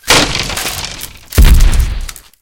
Door falls to floor
Heavy wooden door being kicked in and crashing to the floor
bashed, battered, busted, crash, crunch, door, fall, Heavy, kicked, wooden